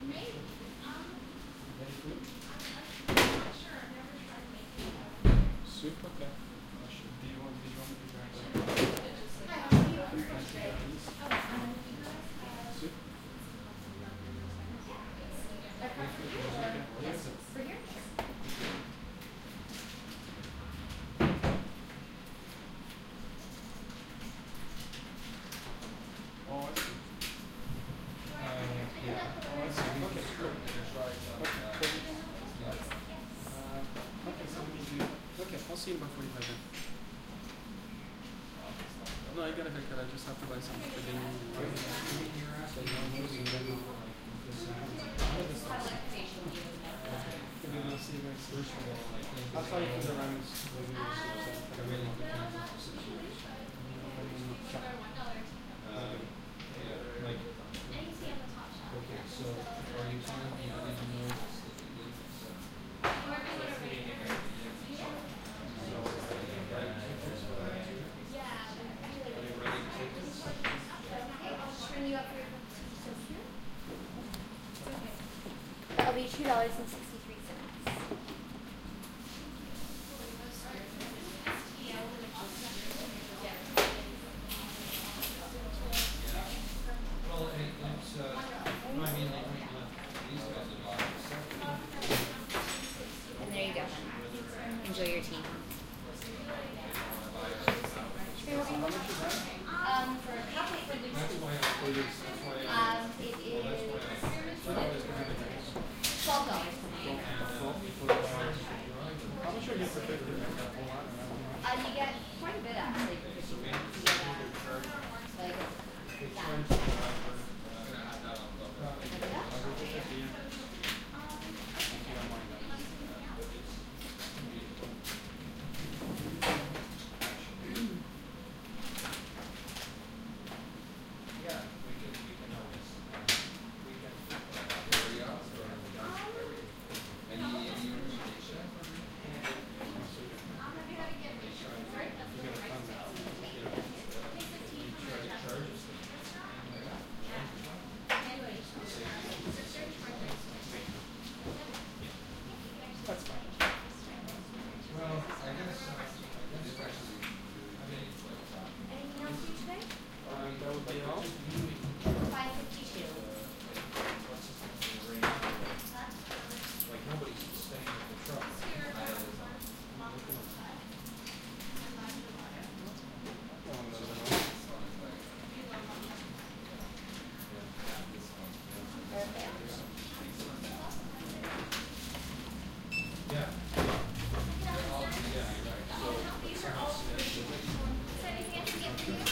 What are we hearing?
walla david tea bloor 110111
Stereo binaural recording of people talking in a shop selling loose tea.
binaural, conversation, crowd, field-recording, people, stereo, talking, walla